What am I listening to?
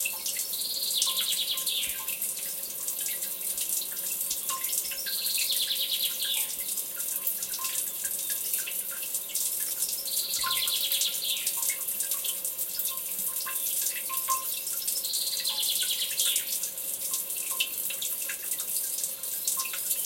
Water Fountain 01
ambiance, ambience, ambient, atmosphere, field-recording, fountain, nature, stream, water